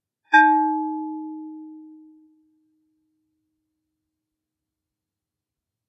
crystal bell
bell, crystal, home